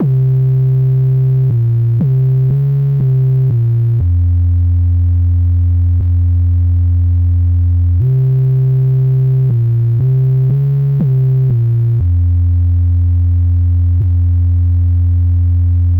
These loops are all with scorpiofunker bass synthesiser and they work well together. They are each 8 bars in length, 120bpm. Some sound a bit retro, almost like a game and some are fat and dirty!
These loops are used in another pack called "thepact" accompanied by a piano, but i thought it would be more useful to people if they wanted the bass only.
120bpm, bass, dub, electro, gaming, loop, retro, riff, synth, synthesiser